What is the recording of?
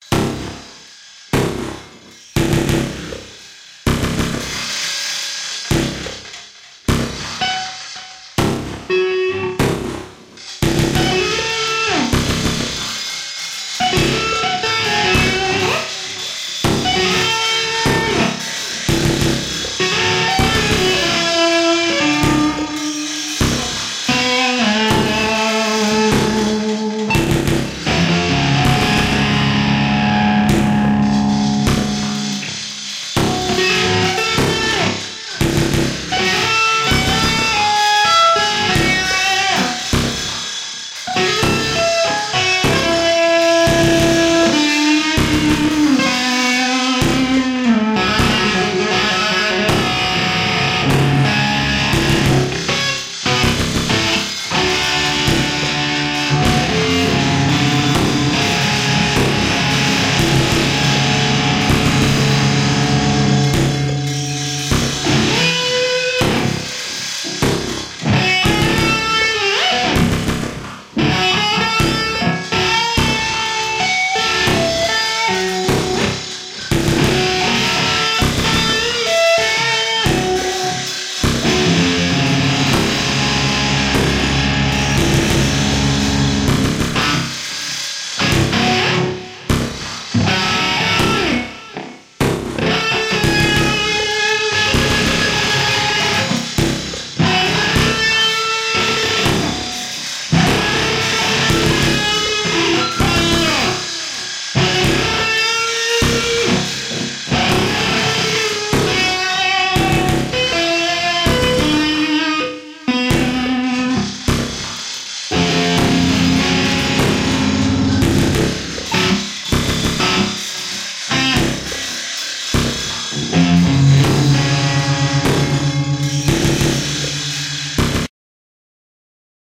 Improvisation with electric guitar and electronics based on the rondeña flamenca
electric, electronics, flamenco, guitar